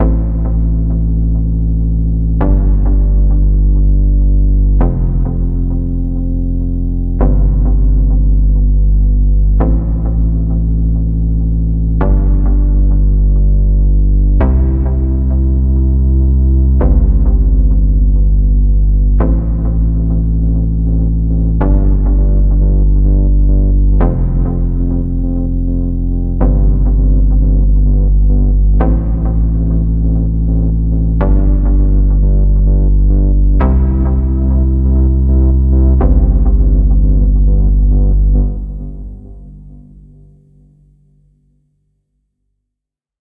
SUB37 DuoMode 160404
MOOG Sub 37 in Duo Mode with big EMT 250 Reverb on it.
Analog, EMT-250, MOOG, Paraphonic, Reverb, Sample, Sub37, Synth